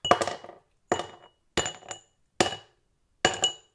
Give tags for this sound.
restaurant glass bar ice-cubes

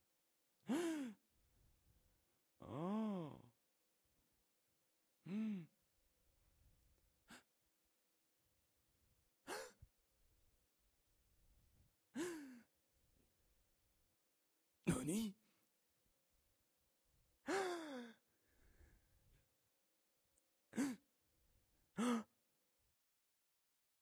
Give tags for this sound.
AMAZED SHOCK BOY OWI SURPRISED MALE GASP MAN

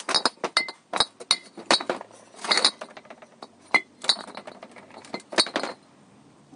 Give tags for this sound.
bottle wine glass

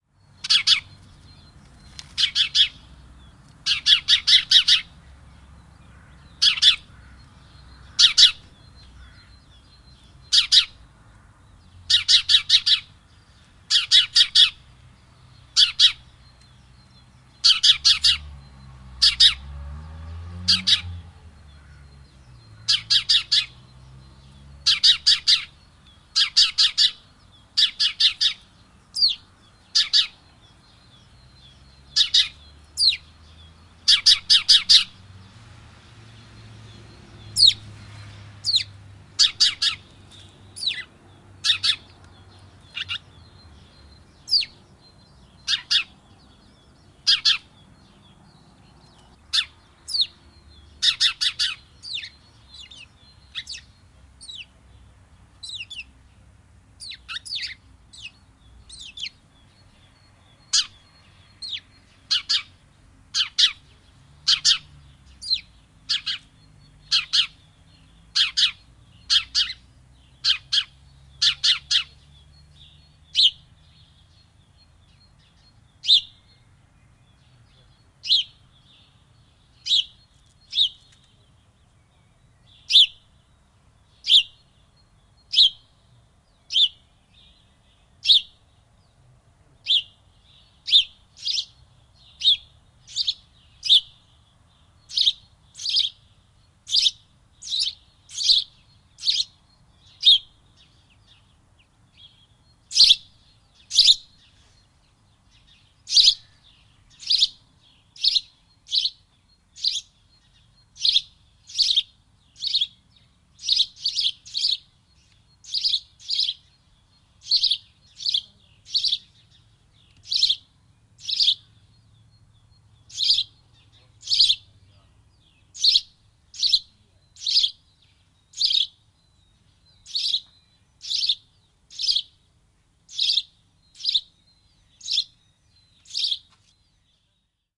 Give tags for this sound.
Field-Recording Varpunen Sirkutus Birds Yleisradio Finland Suomi Twitter Chirp Spring Bird Cheep Yle Linnut Finnish-Broadcasting-Company Viserrys Tehosteet Lintu Soundfx

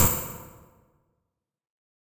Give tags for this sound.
hand-drawn sample

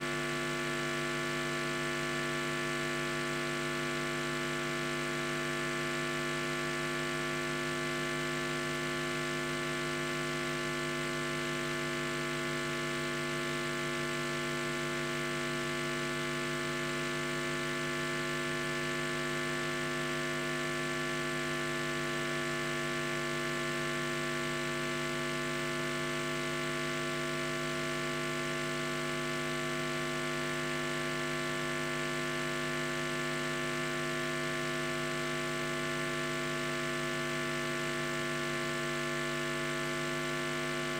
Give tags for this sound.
electric noise hum frequency electronic rf interference digital radio fridge static glitch

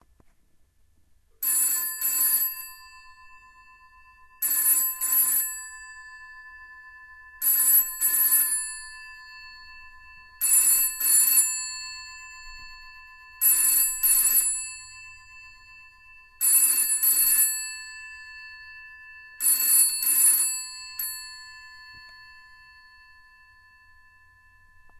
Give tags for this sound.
1950s 332L bakelite bell British cell cinematic close-miked electromechanical field-recording full-sequence GPO isolated loopable mobile phone phone-bell phone-ring phone-ringing ring ringing ringtone rotary-dial telephone vintage

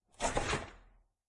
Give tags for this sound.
ripping satisfying

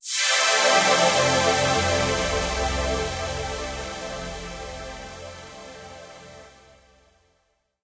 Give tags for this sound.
effect filter fx intro logo sound square swoosh synth wave

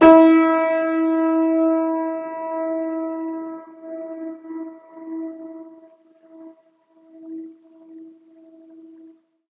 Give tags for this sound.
piano notes complete sustain old keys reverb

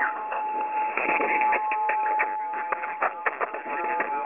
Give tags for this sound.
static radio tone shortwave crackly interference noise

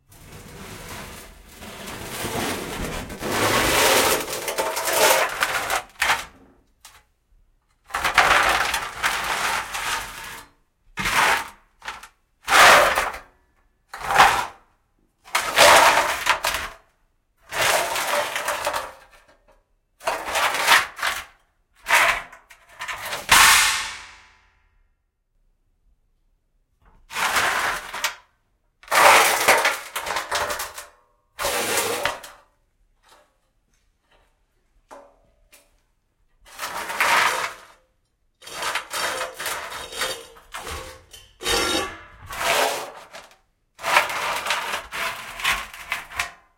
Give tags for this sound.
drag floor metal pieces sheet various workshop

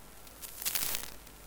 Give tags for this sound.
buzz cable electricity fault faulty hiss noise sparking Sparks static

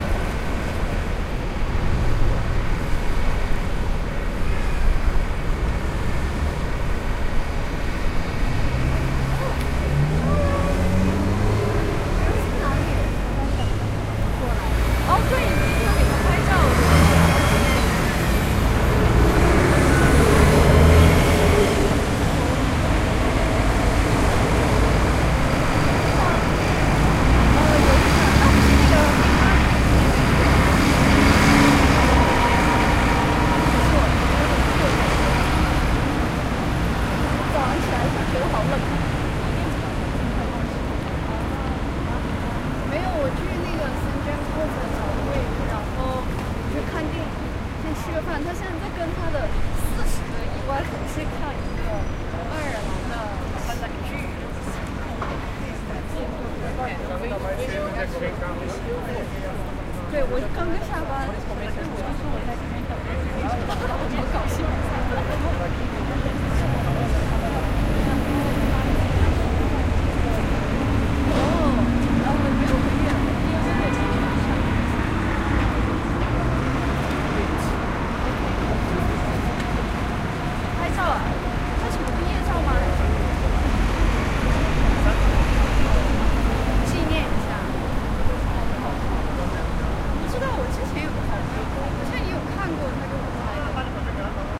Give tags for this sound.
fringe-festival edinburgh evening street summer traffic field-recording people ambience city

